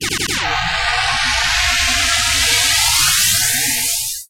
Exhausted laser jingle component